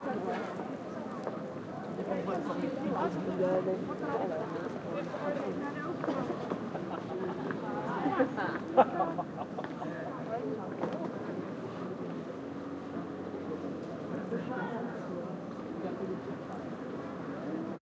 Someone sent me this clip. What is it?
Ambience Amsterdam Square
Recorded in Amsterdam December 2013 with a Zoom H4N.
Environmental, Field-Recording, Atmosphere, Ambience, Winter-Time, Amsterdam